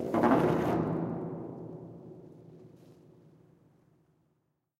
Recordings of different percussive sounds from abandoned small wave power plant. Tascam DR-100.
ambient, drum, field-recording, fx, hit, industrial, metal, percussion